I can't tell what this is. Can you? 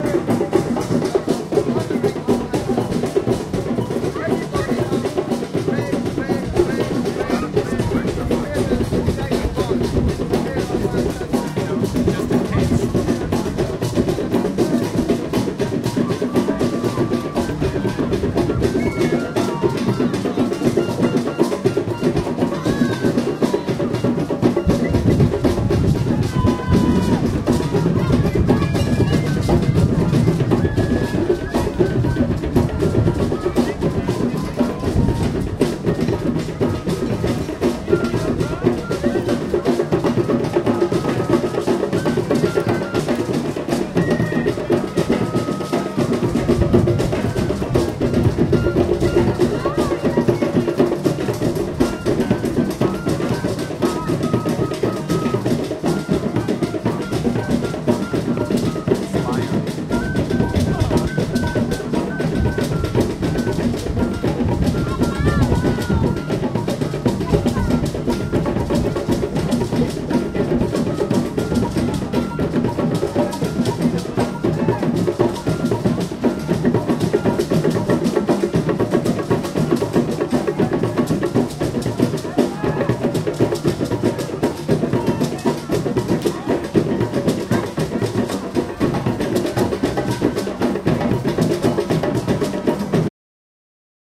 A recording of improvised drum grooves from the "Drummer's Grove" drum circle in Prospect Park. Lots of different sized drums. A flute joins in partway through.
There's some wind noise but also some good, clear sections.
Taken on 5/20/18 with a Zoom H1 handheld recorder.